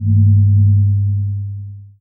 remi fillot01
/////description////////
Risset Drum created with Audacity, edited with amplification, echo, opening fade and closing fade
//////////Typologie (P. Schaeffer)///
V : Continu varié
////////Morphologie////////////
- Masse:
son cannelés
- Timre harmonique:
lourd et vibrant
- Grain:
son avec un peu de grain
- Allure:
léger vibrato
- dynamique:
Attaque graduelle mais rapide
- Profil mélodique:
variation serpentine
drum, echo, risset